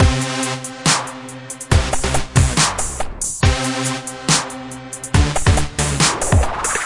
W.I.O.dubstep loop005
drum, drums, dubstep, electro, loop, synth, synthesizer